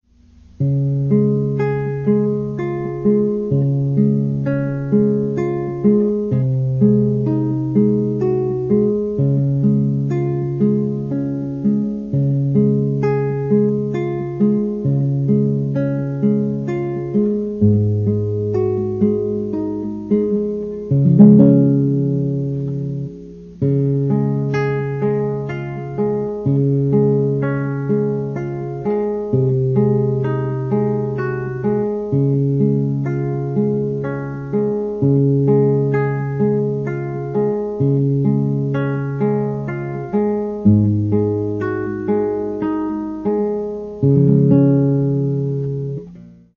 MODERATO IN C(partial)
Classical, Short, Study, Guitar